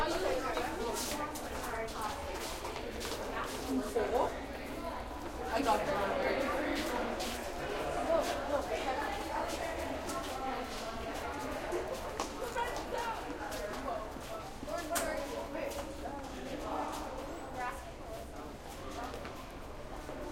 crowd int high school hallway light short